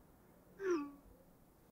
receiving a text
class, intermediate, sound